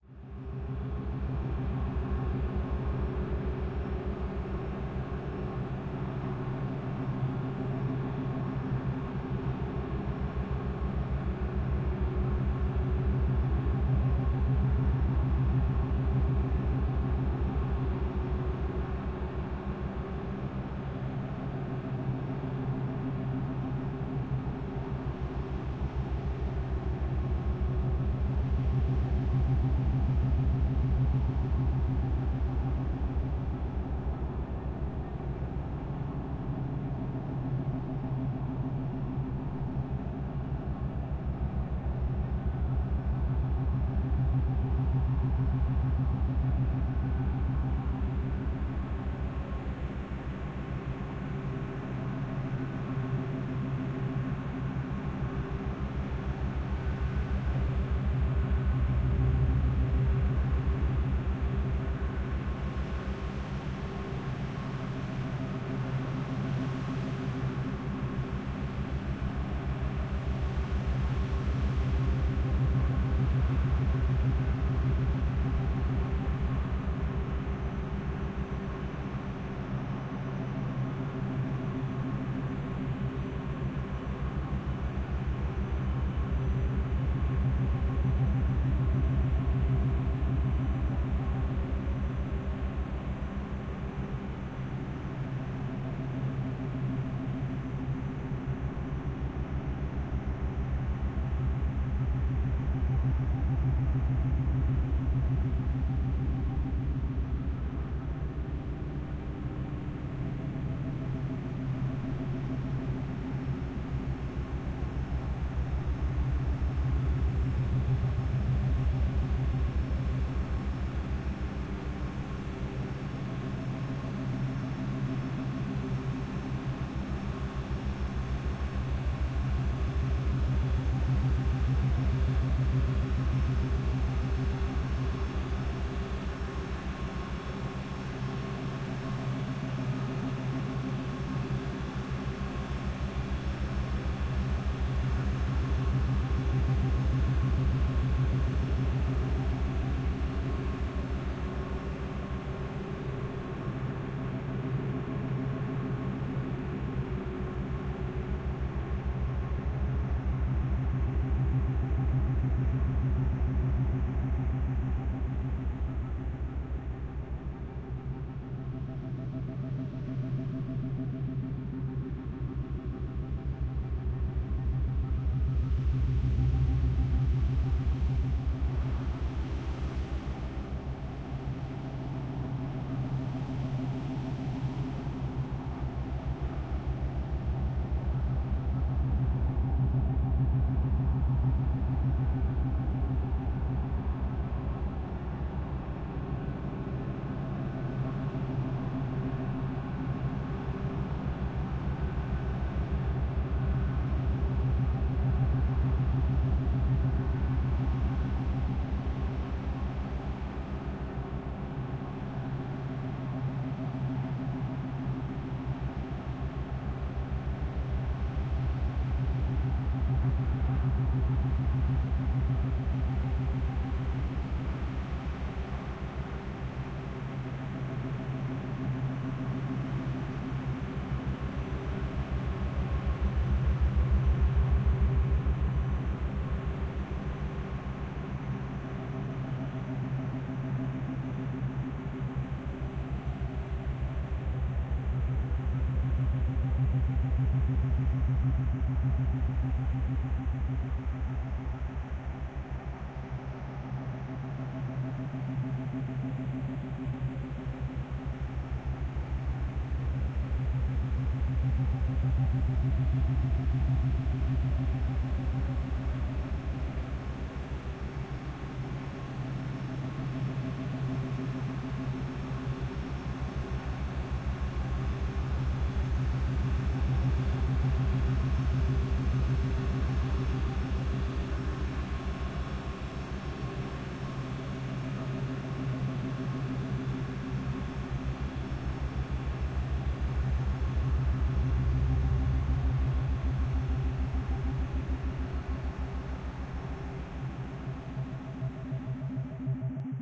Airship Drone
I've mixed and edited a few sounds of wind from this website, as well as some of my own creation, to simulate the sound of an airship in flight.
Referenced tracks include:
Anton- Wind1
Glaneur-de-Sons_Vent-Wind-1
InspectorJ- Wind-Synthesized-A
Hope this is helpful for whatever you use it in!
atmosphere, engine, ambience, noise, white, wind, drone, sci-fi, flying, air